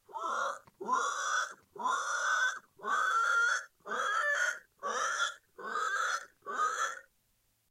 Human impersonation of a pig. Captured with Microfone dinâmico Shure SM58.